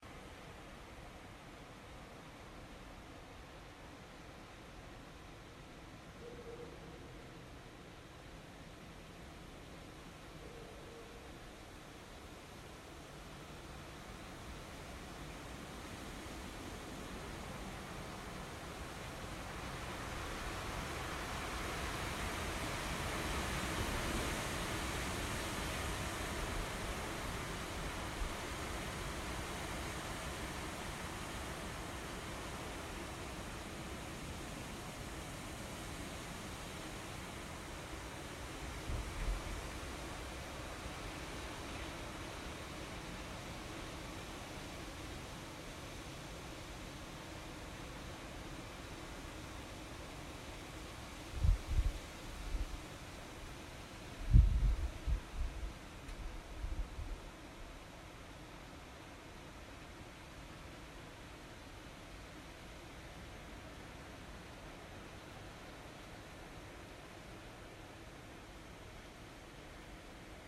Wind through trees (loop)
Strong wind passing through the trees. Recorded in Czech Republic. Sound trimmed in a way that makes it good for looping (so you can use it as a continuous sound).
And for more awesome sounds, do please check out my sound libraries.
forest, leaves, loop, nature, trees, wind